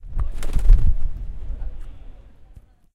Colom repetit
eliminar, UPF-CS12